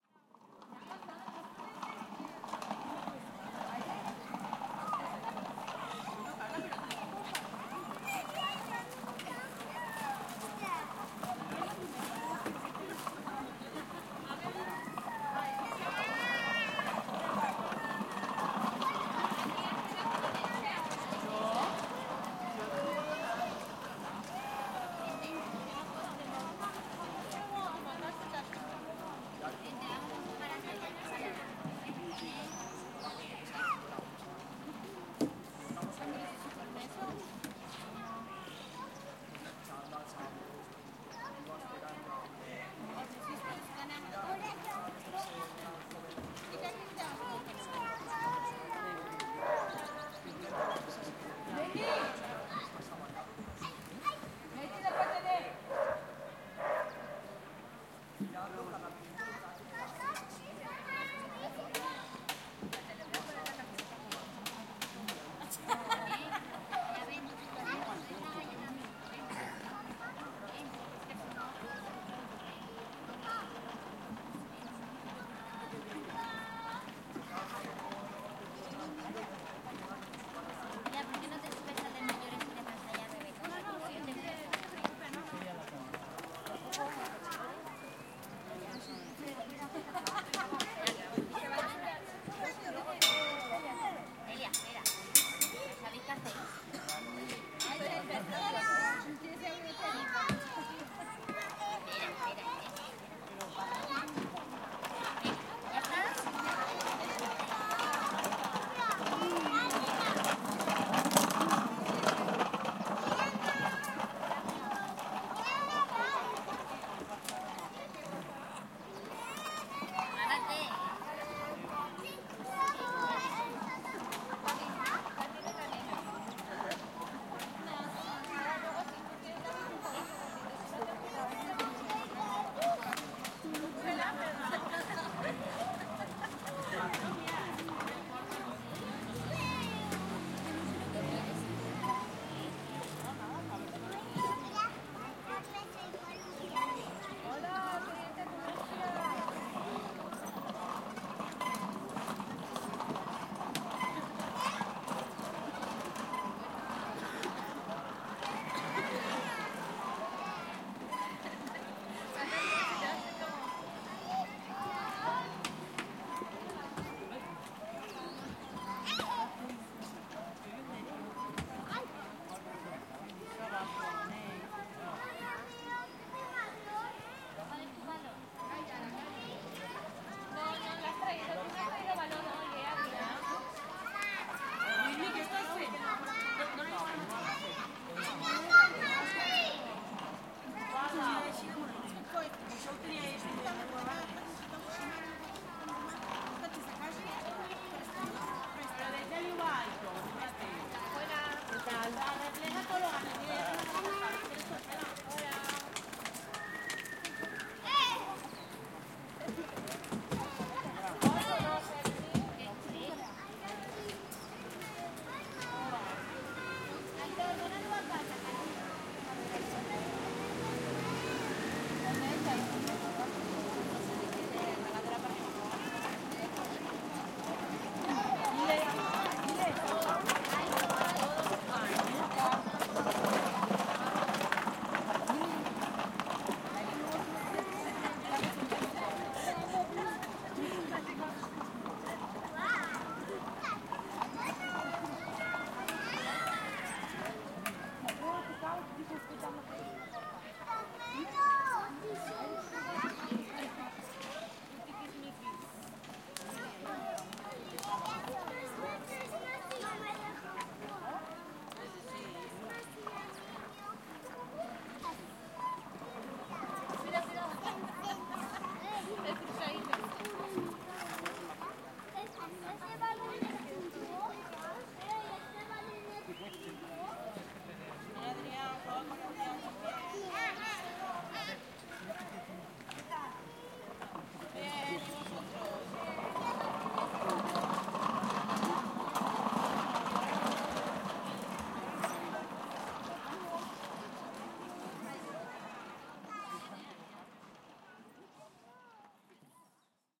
PLAYGROUND GANDIA SPAIN FRONTAL
Recording a small playground with children and their parents in an autumn afternoon in Gandia Spain